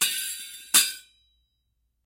University of North Texas Gamelan Bwana Kumala Ceng-Ceng recording 7. Recorded in 2006.